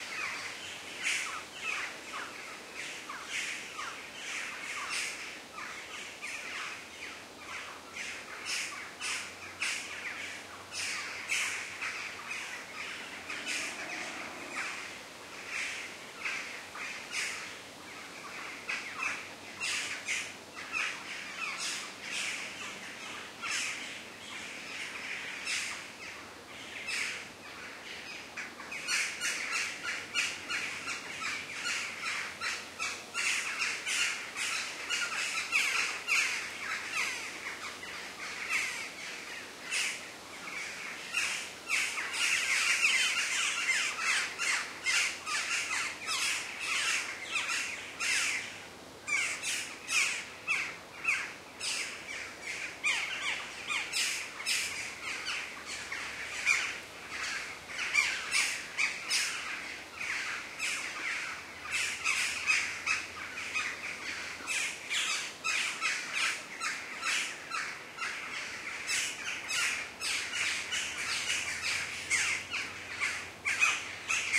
Birds In The Forest.
bird birdsong nature field-recording forest spring birds ambience ambiance ambient sounds forest summer general-noise insects atmosphere soundscape background-sound atmos atmo background
Album: Nature Sound Of Scotland
soundscape, field-recording, insects, bird, forest, atmo, general-noise, nature, ambient, ambience, spring, background-sound, birdsong, birds, atmos, atmosphere, sounds, summer, ambiance, background